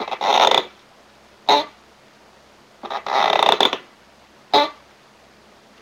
A squeeky old, thrift-store office chair. Comfy, but loud. Recorded on a Sony IC voice recorder and filtered for hissing. Two louder, groaning squeeks or squeels.
chair, effect, groan, groaning, lofi, squeek, squeeky, squeel